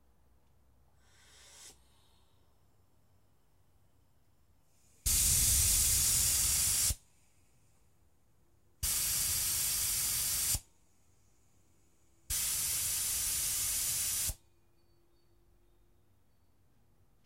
jato de ar compressor
compressor de ar, jato de ar.
motor, machine, mquina